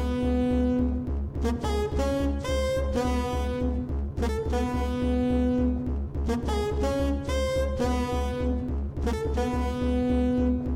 sax realtime edited with max/msp